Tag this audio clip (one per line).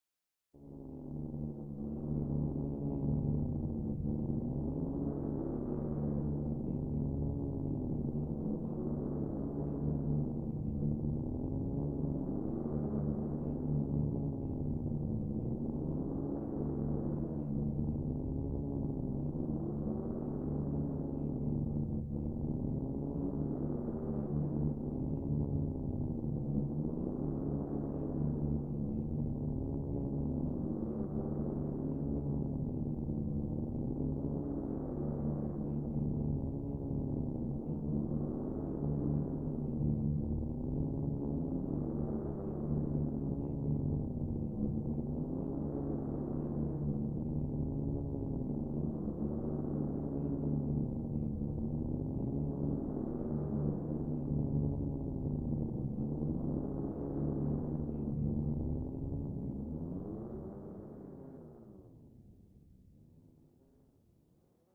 ambient
atmosphere
background
bridge
dark
deep
drone
effect
electronic
energy
engine
future
futuristic
fx
hover
impulsion
machine
Room
rumble
sound-design
soundscape
space
spaceship
starship